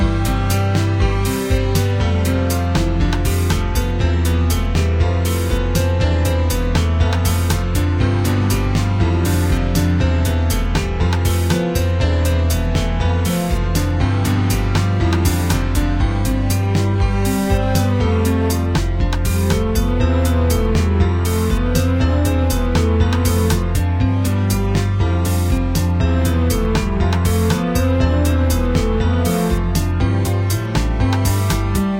made in ableton live 9 lite - despite many crashes of ableton live 9 lite
;the program does not seem to work very well on my pc - luckily the program has
built in recovery for my midi projects after crashes occur.
- vst plugins : Balthor, Sympho, Alchemy, ToyOrgan, Sonatina Flute- Many are free VST Instruments from vstplanet !
bye
gameloop game music loop games organ piano sound melody tune synth ingame happy bells